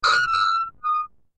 I created a strange and wonderful patch with my Nord Modular synth that was capable of making very realistic metallic scraping sounds.